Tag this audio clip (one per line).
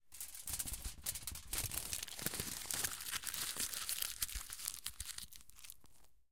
metal
rustle
aluminum-foil
crinkle
tearing
crunch
foley
handle
tinfoil
metal-foil
handling
foil
tear
metallic
aluminum
crush
tin-foil